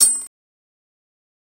Bottle Cap Glitch #3
a glitch in the system is an opening. a malfunction creates a perceptual crack where the once inviolable and divine ordering of life is rendered for what it is: an edifice, produced and maintained through violence and cruelty, a thin veneer that papers over its gaping emptiness. To take the glitch as invitation to invent anew; this is the promise of field recording capital's detritus.
Recorded with a Tascam Dr100.
drum-kits, field-recording, percussion, sample-pack